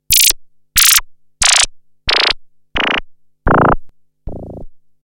moog mod filter13
Moog Prodigy modulation filter sounds
Recorded using an original 1970s Moog Prodigy synthesiser
70s, effect, fiction, moog, prodigy, retro, science, sci-fi, space, synth, synthesiser